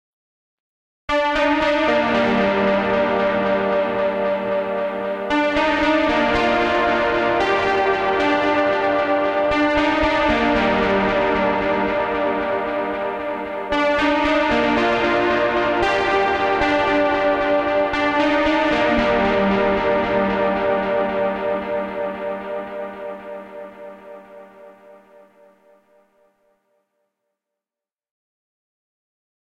Lil 80s Vibe Synth Lead [114bpm] [G Minor]
80s, aesthetic, free, lead, loop, melodic, melody, synth, synthwave, vaporwave